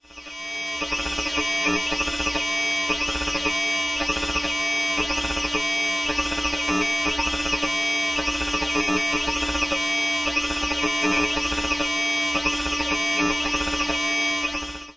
Panning sawwave with hollow-sounding blips. Made on an Alesis Micron.
alesis, synthesizer, sawwave, blips, micron